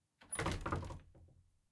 Door swings shut
Heavy wooden swinging door shutting.
close, closing, door, shut, shutting, wood, wooden